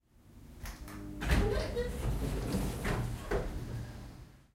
elevator door open 2
The sound of a typical elevator door opening.
Recorded at a hotel in Surfer's Paradise with a Zoom H1.
door, lift, opening, closing, sliding